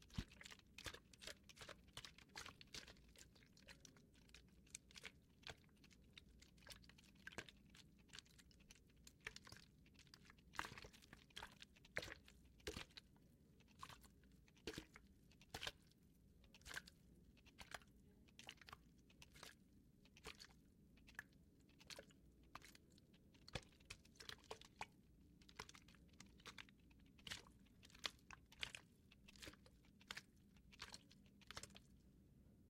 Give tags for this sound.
wet
sploosh
gurgle
squish
water
splish
sludgey
splash
slush
gross
guts
sludge

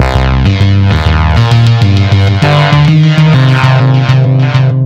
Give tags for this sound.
dance
loop

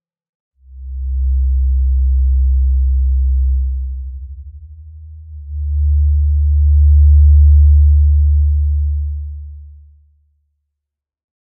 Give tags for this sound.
Edited
Mastered

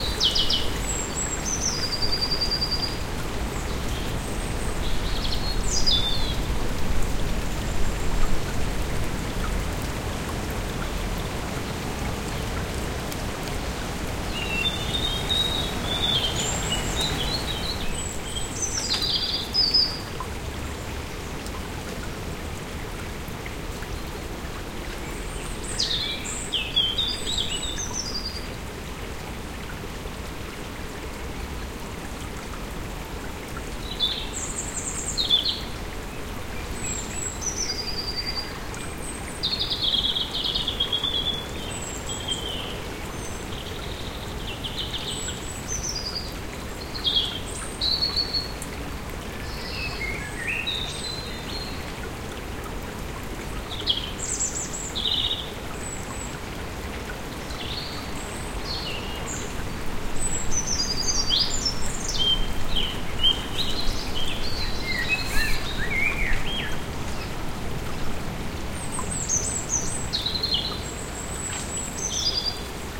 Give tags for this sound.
birds
field-recording
forest
nature
river
trees
water
wind
woods

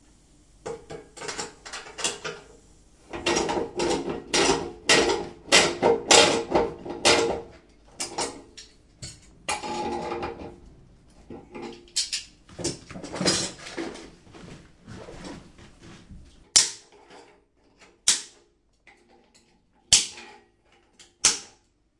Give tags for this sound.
case
connect
connection
fixing
forging
gas-pipe
iron
metal
pipe
repair
repairs
replacement
replacing
tool
tools
working

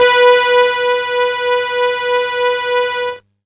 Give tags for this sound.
lofi
from
sample
homekeyboard
44
16
hifi

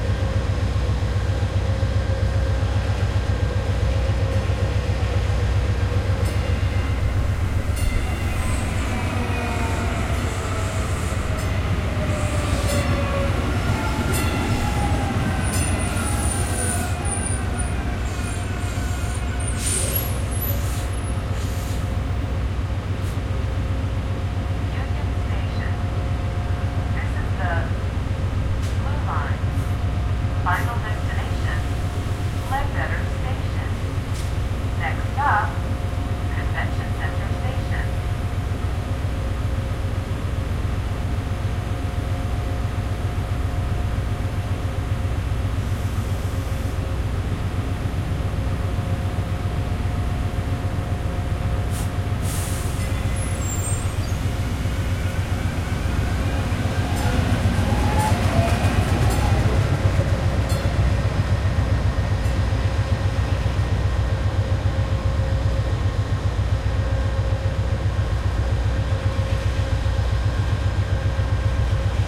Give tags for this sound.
train-station
train-leaving
train
train-arriving

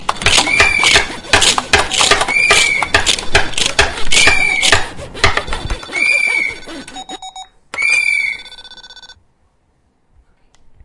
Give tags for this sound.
cityrings
soundscape
galliard

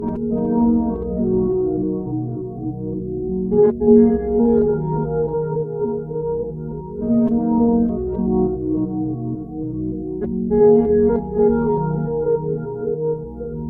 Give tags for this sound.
calm
ambient
atmosphere
quiet

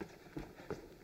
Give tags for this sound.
Running
Boots
Footsteps

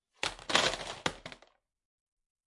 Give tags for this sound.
drop
dropped
DVD
fall
falling
floor
Shells

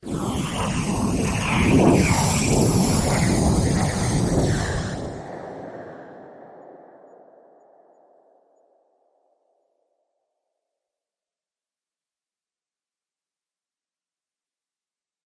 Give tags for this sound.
aeroplane
aircraft
airplane
aviation
burner
engine
engines
flight
fly-by
jet
jet-engine
launch
plane
rocket
thruster

